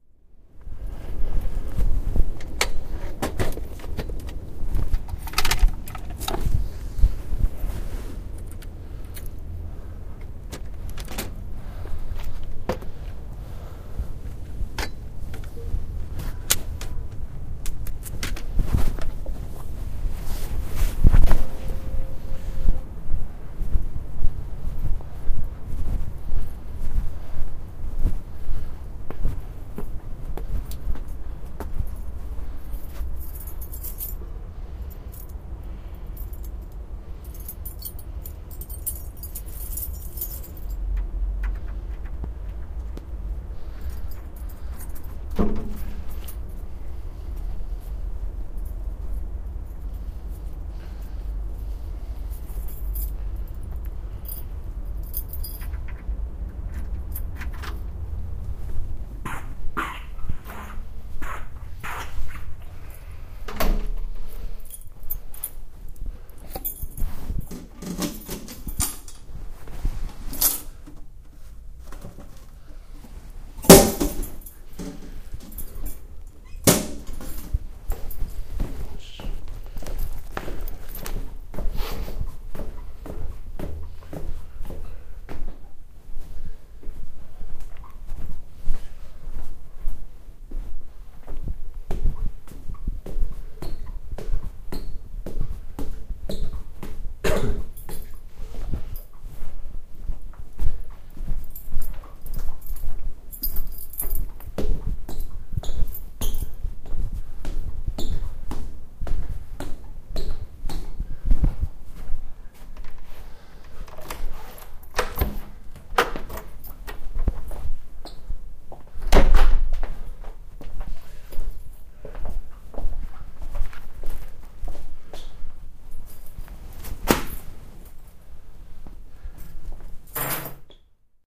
I'm arriving with my bike and fasten it to an appropriate piece of street furniture. I walk towards and climb the stairs that lead from the street to the door that gives access to the staircase I share with 7 others and find my keys, at first I take the wrong keys. I unlock the door to open it. I wipe my feet on the doormat. I open the mailbox and take out what's in it before closing it again. I climb the stairs to the third floor where I open the door to my apartment. I close it again and walk into my livingroom throwing the keys on the table. My shoes are wet and I wear corduroy jeans. Recorded with an Edirol-R09 in the inside pocket of my jacket.